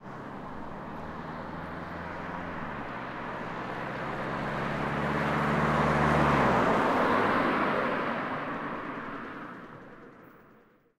Vehicle Car Passby Exterior Mono
Passby Exterior - Peugeot 308.
Gear: Rode NTG4+.
peugeot, exterior, drive, automobile, vehicle, driving, 308, Car, motor, passby, zoom, engine, rode, h5, acceleration, idle